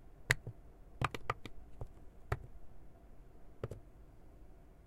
Pressing Buttons on Keypad
I pressed some buttons on a keypad.
Buttons, Click, Keypad